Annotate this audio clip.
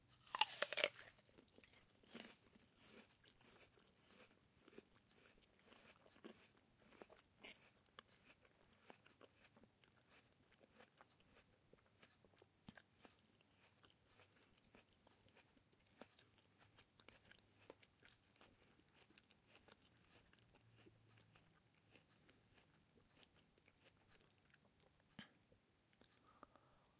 Apple Bit and Chewing 1
A recording of me chewing/crunching on an apple recorded on the zoom H5. I do a lot of takes so there is plenty to choose from in this first recording. I also denoised and cleaned up any artifacts. Good for ADR. Enjoy!
adr, chew, chewing, chomp, crunch, crunch-sound, crunchy, eating, eating-apples, field-recording, foley, h5, mouth, munch, saliva, smacking, snack, stereo, zoom